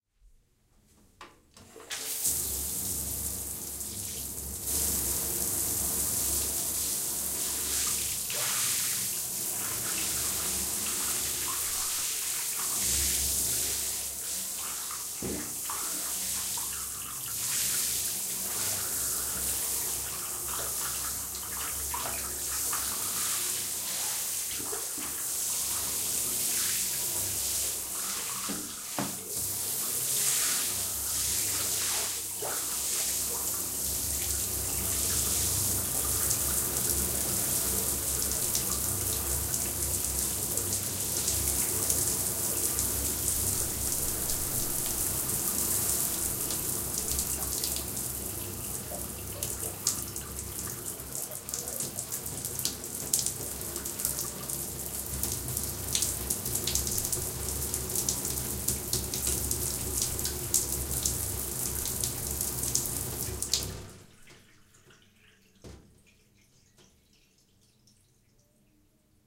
Shower On Off
shower turning an & off;
recorded in stereo (ORTF)